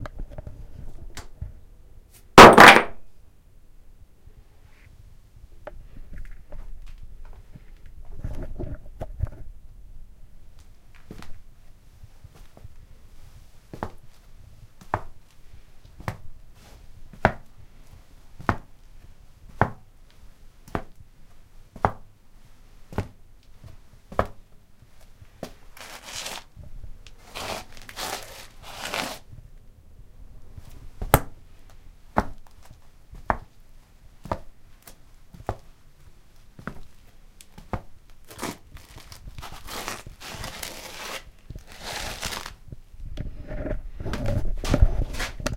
footsteps inside a house